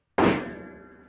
hit lid with sledge hammer
Hit lid of Piano with Sledge Hammer.
Hammer, Hit, lid, Piano, Sledge